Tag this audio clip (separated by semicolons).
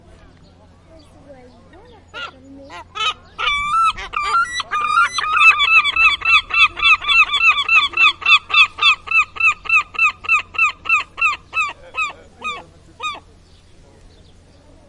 nature; scream; sea; ocean; seaside; bird; shore; coast; beach; field-recording